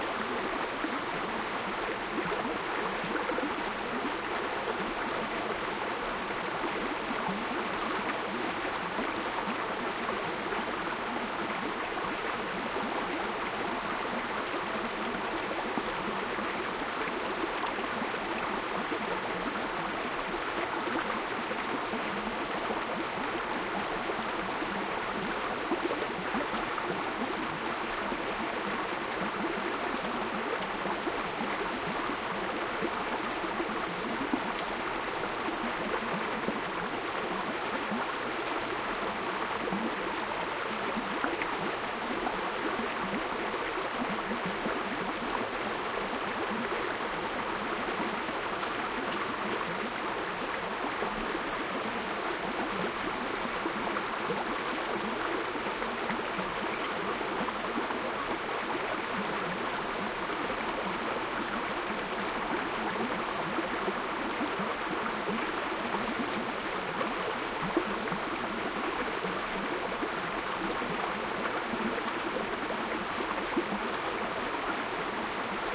Recording of stream sounds using an Edirol R09HR with Sound Professionals Binaural mics positioned on trees to create a stereo baffle.